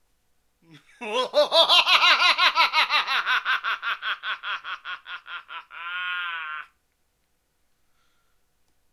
evil laugh-02
After making that mash up with analogchills scream i got bored and well decided to make a evil laughs pack. Seeing as the evil laughs department here is a touch to small.
evil, scary, ForScience, male